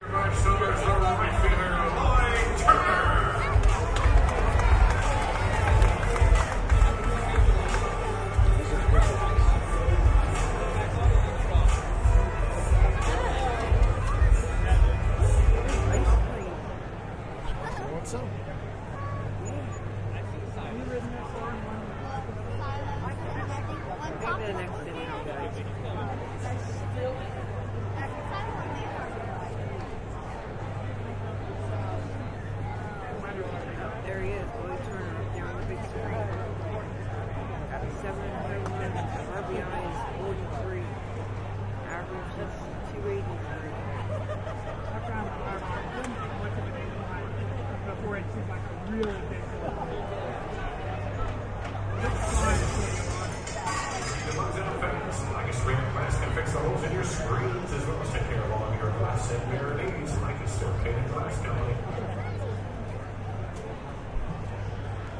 02paint and glass
In the stands behind home plate at a minor league ballpark. Lots of chit-chat among the fans but about half-way through this segment you can hear a pitched ball being hit foul, which is followed by the recorded sound of glass breaking and the announcer reading copy for a local paint and glass company.